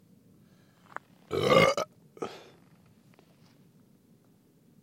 A burp. Recorded with a Tascam DR-05 and a Rode NTG2 Shotgun microphone in the fields of Derbyshire, England. Recorded at 48Hz 16 Bit.
Belch, Burp, DR-05, NTG2, Rode, Tascam